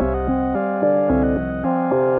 some loop with a vintage synth